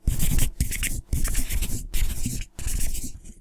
Scribbling with a marker
Thick marker; if you squint your ears, it could sound like someone autographing an 8x10 of themselves.
Recorded for the visual novel, "Francy Droo and the Secret of the Shady Midnight Caller".
write, marker, writing, scribbling, paper, pen, signing